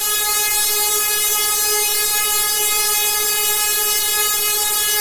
ind white noise robotic echo
Independent channel stereo white noise created with Cool Edit 96. Echo effect applied to simulate a vocoder-like sound.
noise, stereo